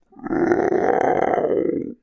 Thạch Phi - Rên 3
Sound for character Thạch Phi in cartoon Xa Tận Tinh. Record use Zoom H4n Pro 2022.02.19 10:30,
Audacity:
• Effect→Change Pitch
Percent change: -40.0
beast, big, cartoon, creature, growl, monster, rock, strong